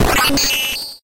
Computer Breaking Sound
Sound of a computer, robot, or machine breaking. Made with Bfxr